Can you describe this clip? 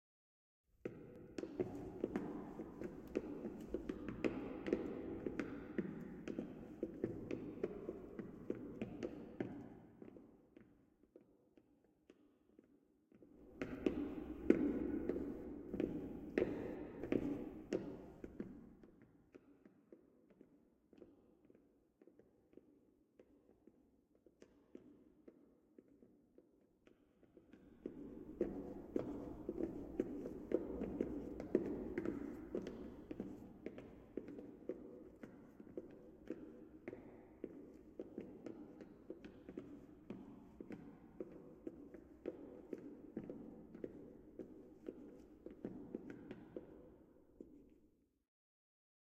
Echoing footsteps down hallway
Footsteps echoing down a hallway. Tile floors.
echoey, foley, footsteps